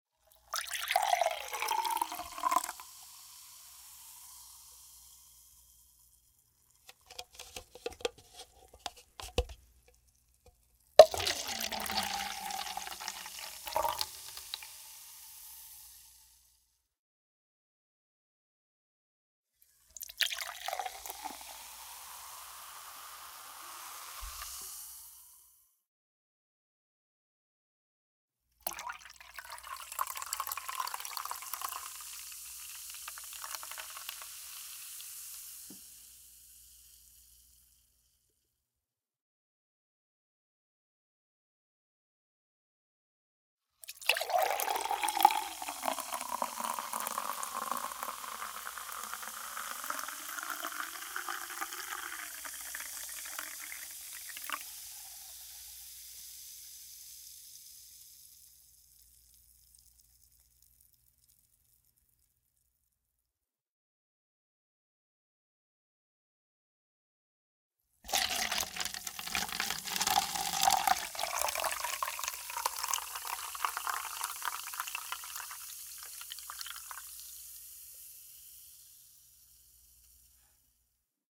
soda pour into plastic cups
cups, into, plastic, pour, soda